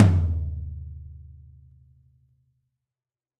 Toms and kicks recorded in stereo from a variety of kits.
acoustic, drums, stereo